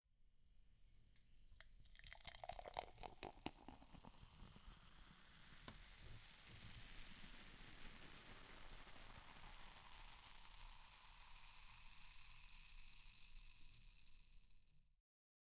The sound of a glass being filled with a soft drink (2).